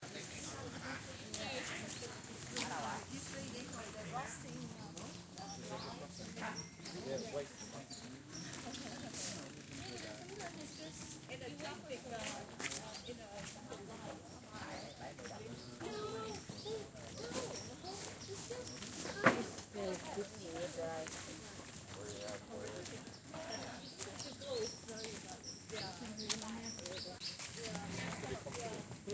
Tourists walking through garden with gravel